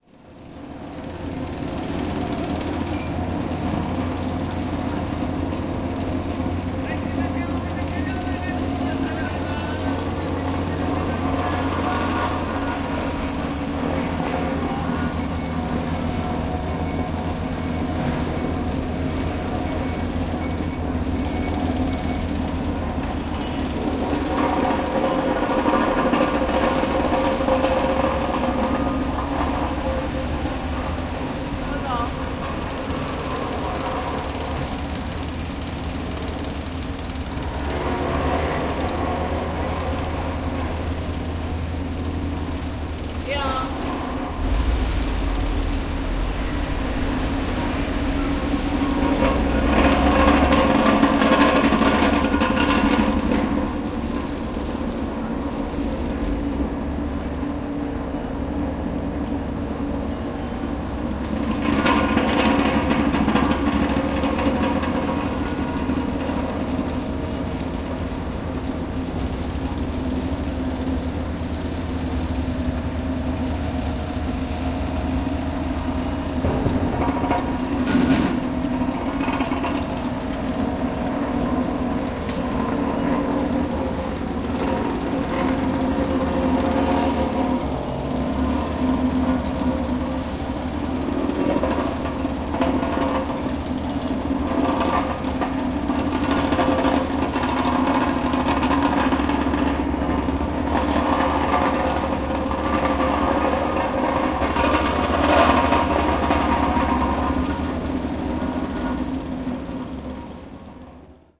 Construction site, building work in the street, jackhammer construction. Helicopter.
20120807